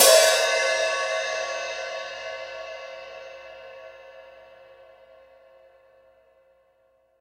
Zildjian A Custom Hi-Hat Cymbals Open Hit
Sampled off of Zildjian A-Custom Hi-Hats